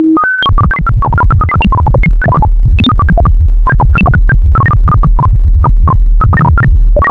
Another cacaphony of broken bleeps
Created with a feedback loop in Ableton Live.
The pack description contains the explanation of how the sounds where created.
beep, bleep, broken, circuit-bent, feedback, Frequency-shifter, pitch-tracking